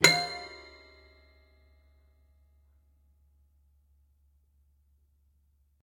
srhoenhut mfp F
A single note played on a Srhoenhut My First Piano. The sample name will let you know the note being played. Recorded with a Sennheiser 8060 into a modified Marantz PMD661.
toy, children, one-shot, srhoenhut, sample, piano, funny, my-first-piano, note